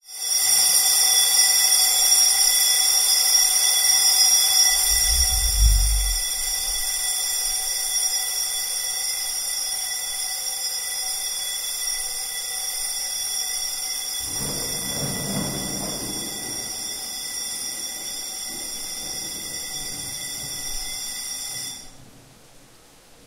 Korea Seoul Firealarm Stops Walking
alarm, alert, bell, city, field-recording, firealarm, hostel, hotel, korea, korean, ringing, rural, seoul, south-korea, southkorea